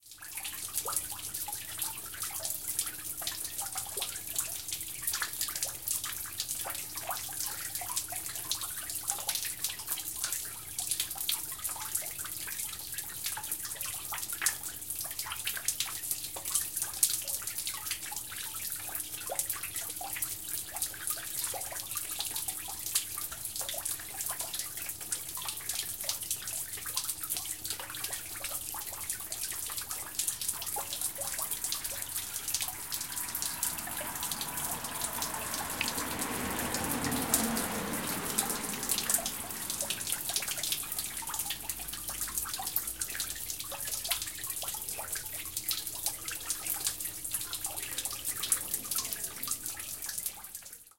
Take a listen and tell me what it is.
Water leaking night parking garage indoors outdoors quiet
Leaking water field recording, quiet night.
water, leaking, netherlands, dutch, garage, field-recording, parking